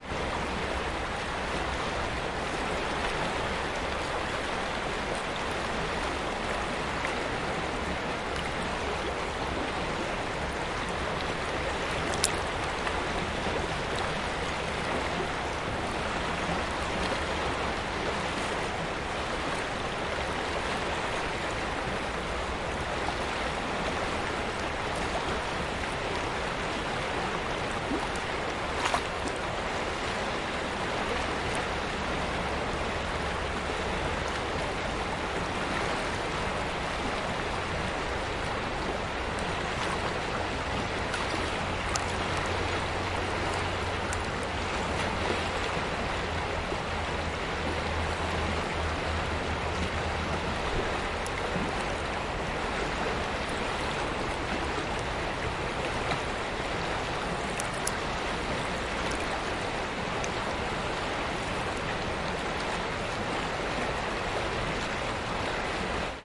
River Maira - Riverside
Recorded very next to the riverside of Maira river in Savigliano (CN) - Italy.